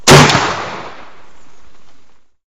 One shot from a dangerous game rifle. A nice, loud report.
405, bang, bangs, environmental-sounds-research, gun, lion, loud, report, rifle, roosevelt, shooting, shot, shots, theodore, winchester